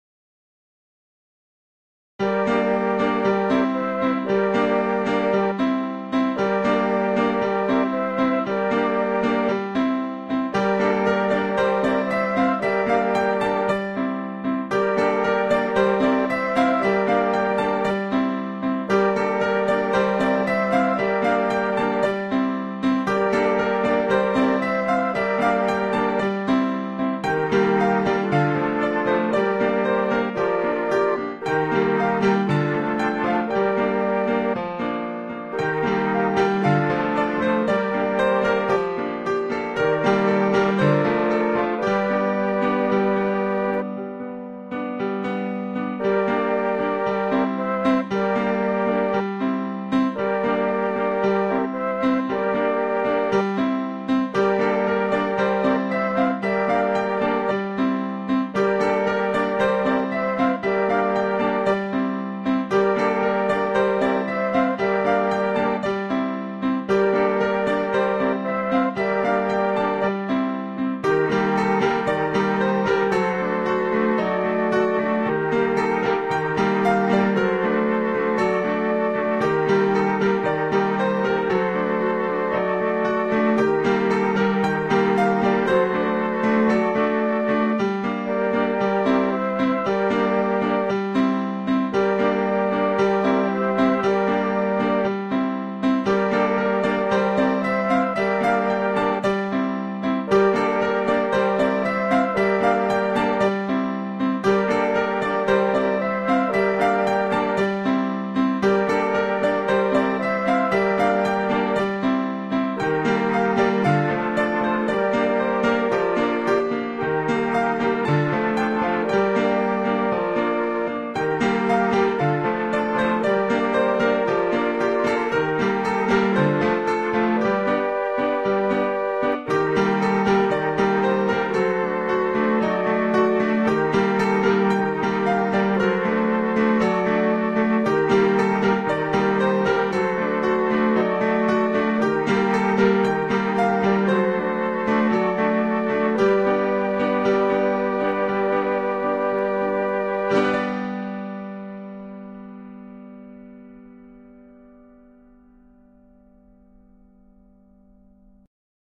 New theme 6
A sound à là Final Fantasy or Legend of Mana...
fantasy, mellotron, soundtrack, piano